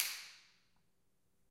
Snaps and claps recorded with a handheld recorder at the top of the stairs in a lively sounding house.
hit, echo, clap, snap, reverb